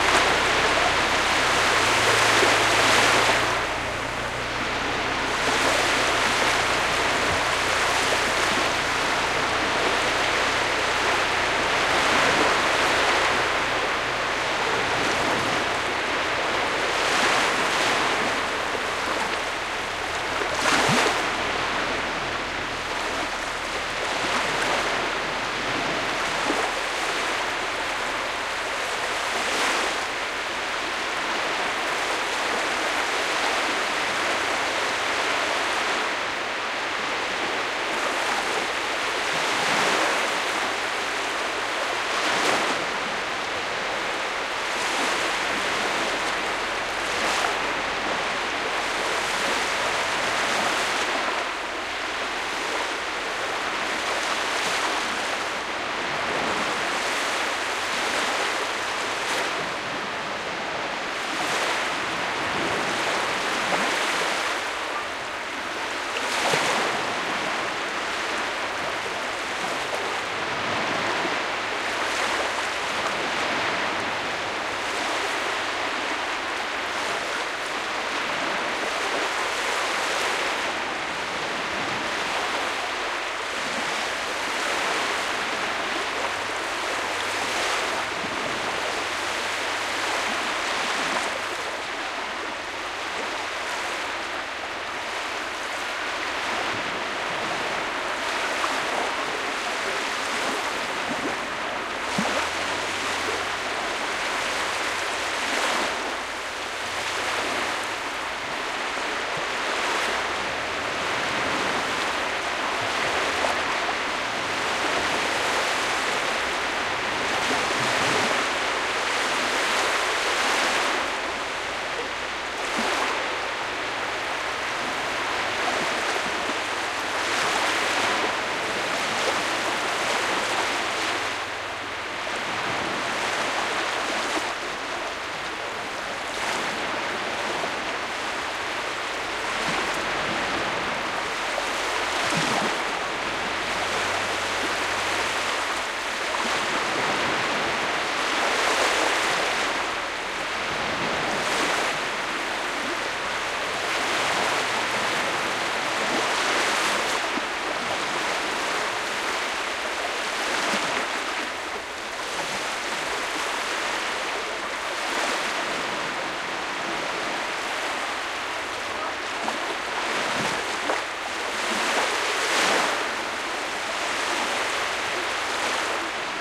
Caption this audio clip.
closer take of small sea waves at Yyteri beach (Baltic sea, Finland). Noise of a vehicle at the beginning, soon disappears. Shure WL183 mics into a Fel preamp and Olympus LS10 recorder.